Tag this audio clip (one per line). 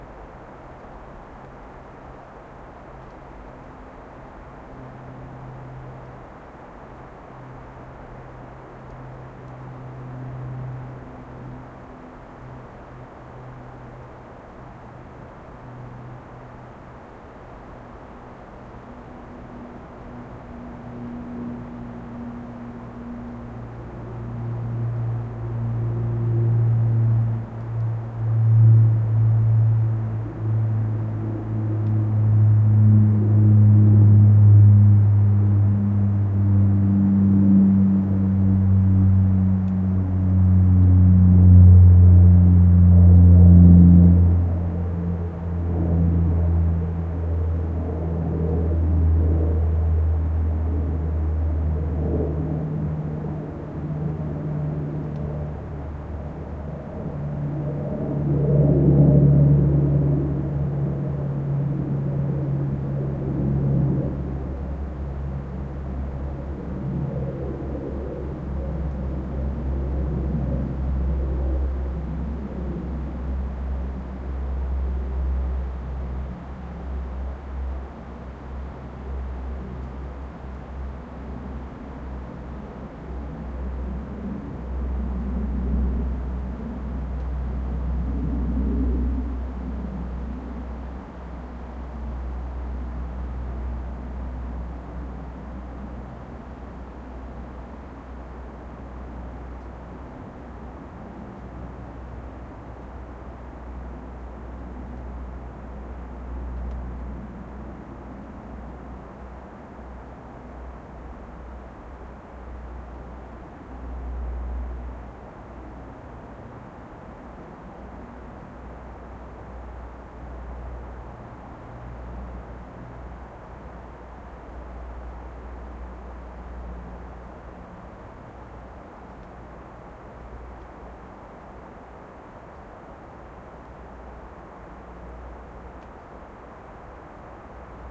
night
plane